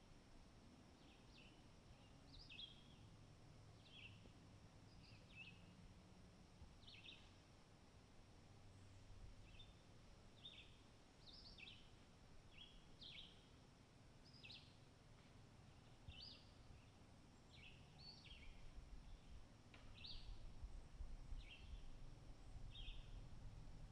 Birds in Woods - daytime
Audio captured at Allegheny State Park.
nature, ambient, birdsong, birds, woods, ambience, field-recording, forest, bird, summer